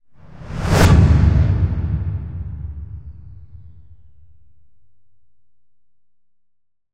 SRS Cinematic Hit
Hit, FX, Trailer, Cinematic, Knife, Impact, Bottle